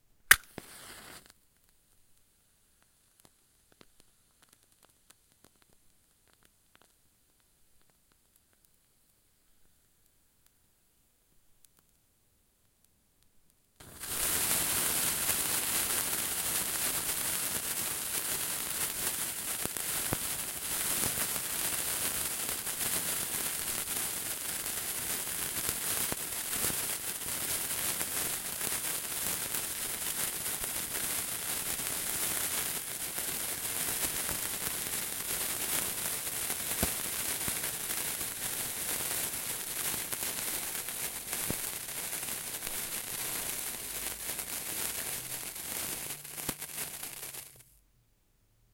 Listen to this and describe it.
A single sparkler recorded very close up(~15cm), and sparks occasionally hits the capsules :O
Starts with match being lit.
sparkler, fuse, fizz, h4n, sparkling, match, fire